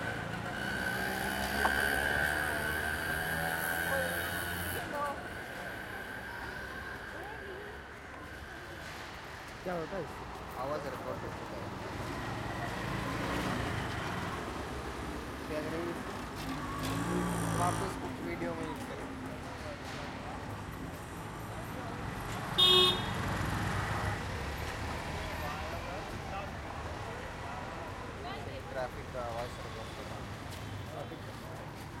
Sounds recorded from roads of Mumbai.